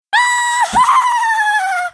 Weird crazy laugh
FYI I was trying to imitate a certain someone's laugh (if you dont know it is makiko) but it came out like this but anyways it a psychopathic laugh recorded on my phone
crazy, female, evil, psycho, psychotic, giggle, laughter, funny, laugh, insane, mad, laughing